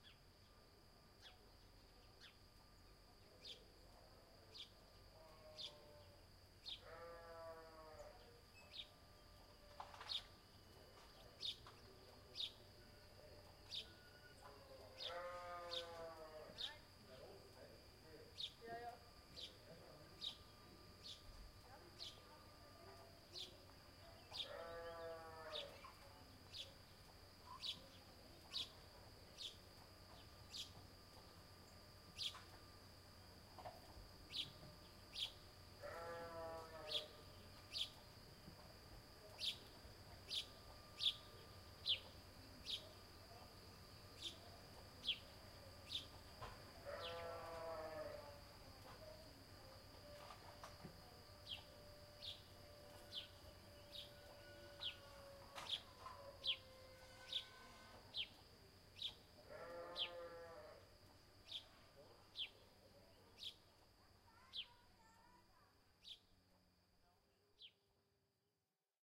Village Noises
Recorded with Zoom H2n in a small village in Switzerland, an early evening in May 2015
early, field-recording, low, splitting, sparrows, speaking, evening, machine, wood, village, people